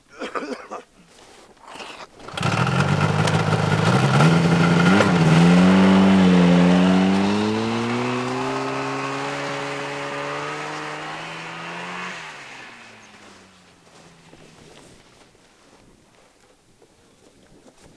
snowmobile start, pull away fast speed nice detail +cough, spit